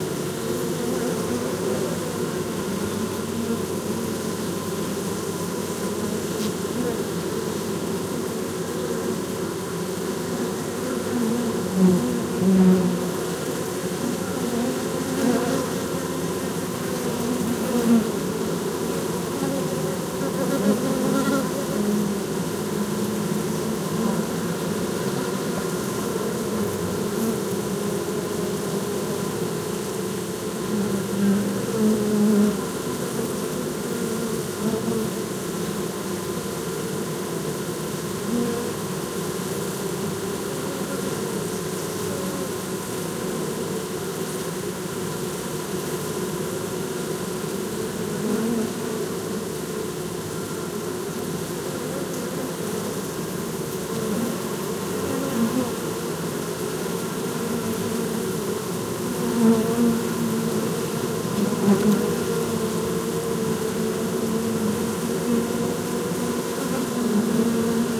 Beehive stereo recording
Nature, Field-recording, Danger, Insects